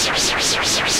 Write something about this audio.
Guerard Karl 2012 13 son2
Synth, Audacity, mono